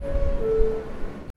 NYC Subway, ding dong door opening sound
NYC_Subway, ding dong door opening sound